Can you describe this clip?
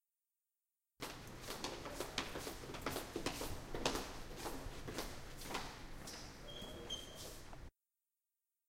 People steps
This sound shows a group of classmates walking on a hall.
It was recorded at Tallers building in Campus Poblenou (UPF).